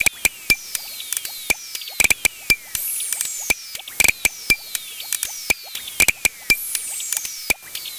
Aerobic Loop -15
A four bar four on the floor electronic drumloop at 120 BPM created with the Aerobic ensemble within Reaktor 5 from Native Instruments. A bit more experimental but very electro. Normalised and mastered using several plugins within Cubase SX.